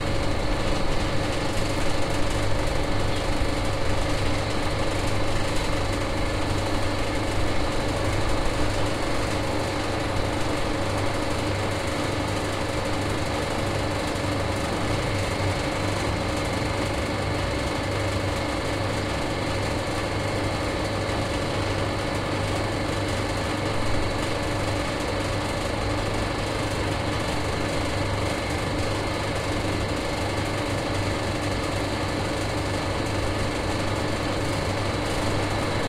air conditioning 3
Hum of air conditioning split-system (outdoor part).
Recorded 2012-10-13.
town, noise, street, city, Omsk, air-conditioning, hum, air, Russia, split-system, conditioning